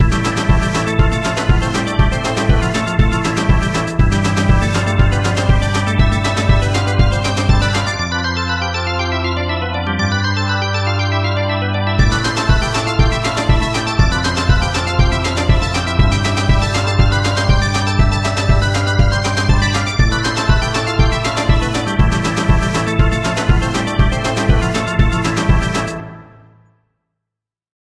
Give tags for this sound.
lonely
rhythmic
game
music
loop
sad
atmosphere
ambience

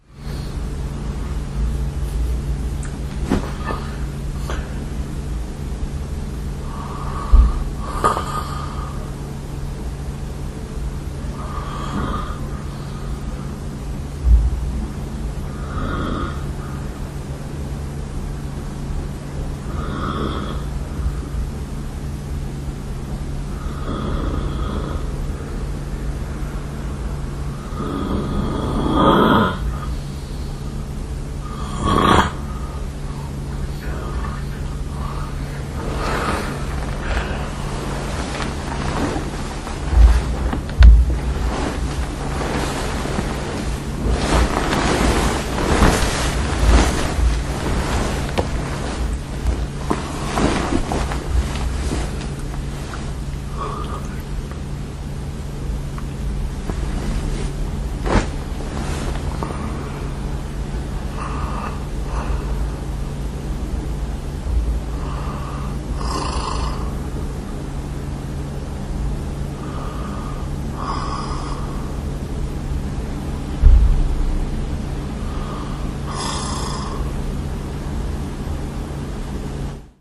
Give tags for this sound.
bed
body
breath
field-recording
household
human
lofi
nature
noise

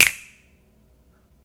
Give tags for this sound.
dry
snap
studio

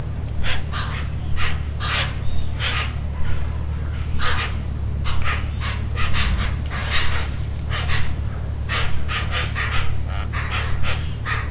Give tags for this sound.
Amazon
ambiance
ambience
ambient
bird
birds
field-recording
forest
insect
insects
Jungle
lagoon
Madre-De-Dios
motorboat
nature
Rain-Forest
River
summer
Tambopata

